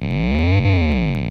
samples taken from an Arius Blaze Circuit-Bent OptoThermin. recorded clean (no reverb, delay, effects) via a Johnson J-Station Guitar Amp Modeller/Effects Unit with a minimum of EQing.
idm, gabber, warble, glitchy, theremin, circuitbent, harsh, percussive